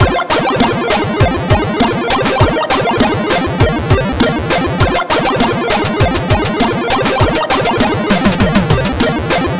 A short snippet of a primitive synth melody and beat sequence that lasts for 4 measures. It could be used for independent game music.